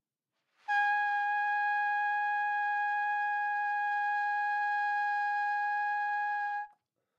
overall quality of single note - trumpet - G#5
Part of the Good-sounds dataset of monophonic instrumental sounds.
instrument::trumpet
note::Gsharp
octave::5
midi note::68
tuning reference::440
good-sounds-id::1441
Gsharp5, good-sounds, trumpet, multisample, neumann-U87, single-note